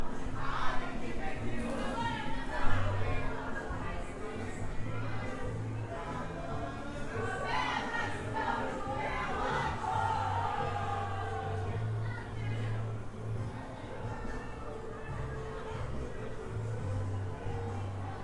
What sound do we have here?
brazilian party 2
some people singing to a brazilian song at a brazilian party in porto